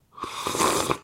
coffee slurp 6
slurping a coffee number 6